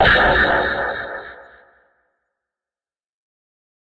BATTERIE PACK 2 - A shot in a black hole
BATTERIE 02 PACK is a series of mainly industrial heavily processed beats and metallic noises created from sounds edited within Native Instruments Batterie 3 within Cubase 5. The name of each file in the package is a description of the sound character.